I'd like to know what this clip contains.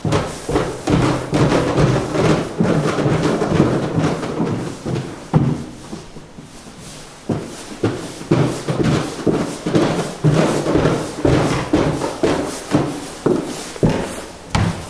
very fast footsteps on wooden stairs. Olympus LS10, internal mics